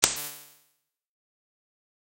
A short synthesized spark. From my Sparks sample pack.